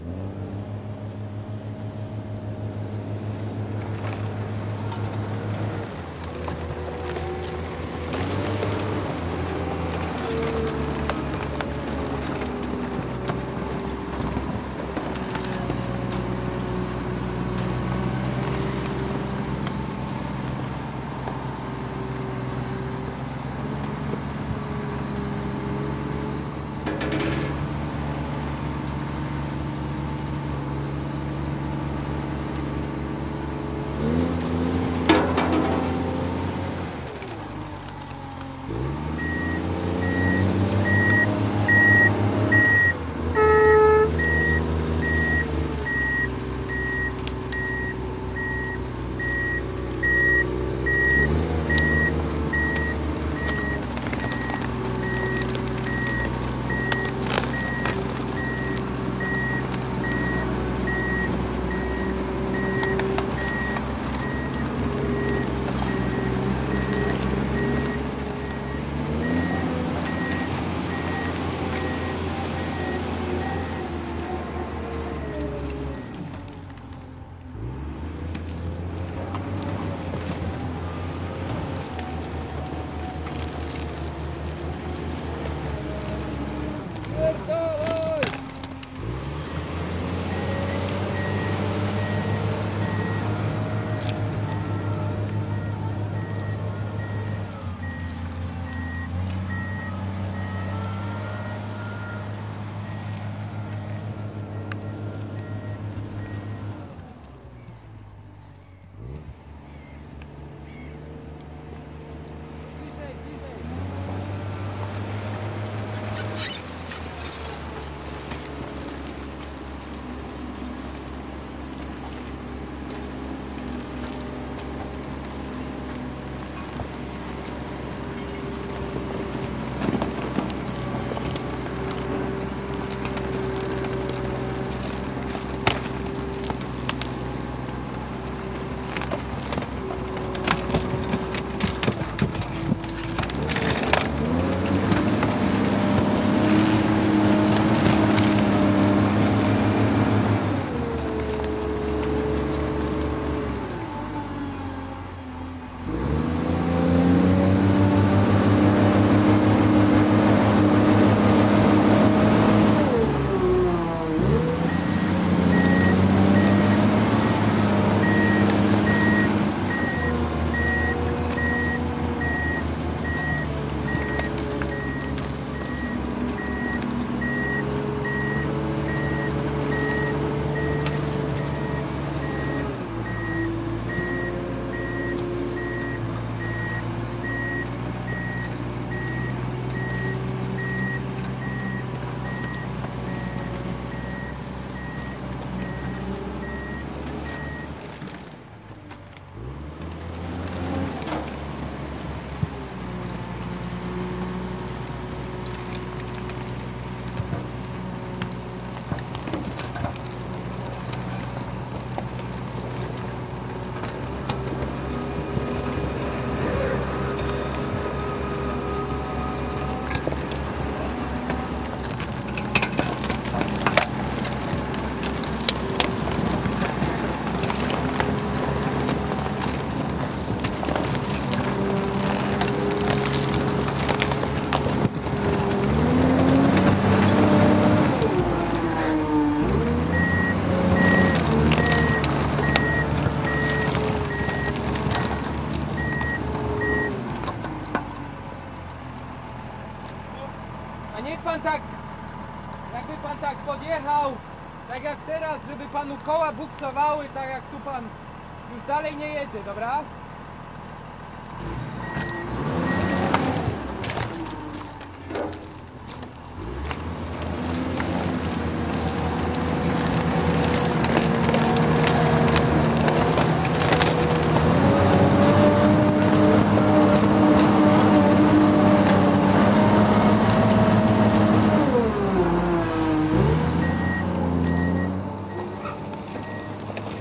Sound of tractor on landfill. Tracktor starts working crushing garbage. Sounds of beeps during reverse gear. Recorded on Zoom H4n using RØDE NTG2 Microphone. No post processing.